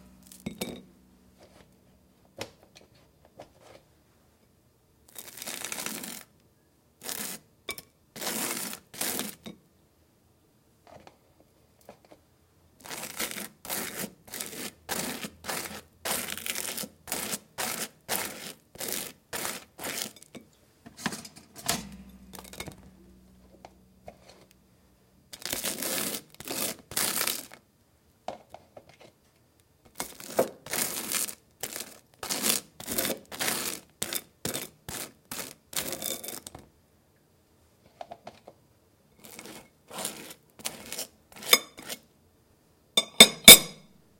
buttering toast
Putting one piece of toast on a plate then buttering it, then taking another piece of toast out of the toaster and buttering it, then putting the knife down on the plate.
butter, cook, food, kitchen, knife, plate, toast